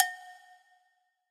Modern Roots Reggae 13 078 Gbmin Samples
13, Reggae, Samples, Gbmin, Modern, 078